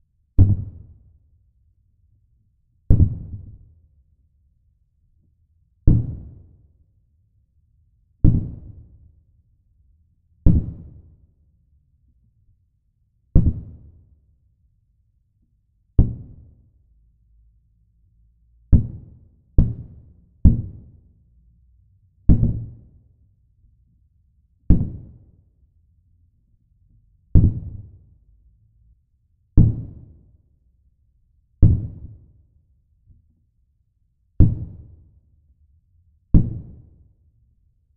Wood Hit 01 Under Water
The file name itself is labeled with the preset I used.
Original Clip > Trash 2.
bang, percussion, scary, trashed